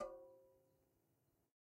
Metal Timbale left open 004
home, real, trash